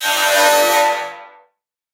cool-buzz
Cool buzz or de-rezzing sound.
electronic, buzz, sound-design